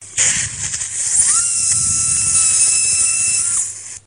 OP Bohrer 14
Geräusche aus einem Operationssaal: Drill noise with clinical operating room background, directly recorded during surgery
clinical, Ger, Klinischer, noise, OP, Operating, Operationssaal, OR, Theater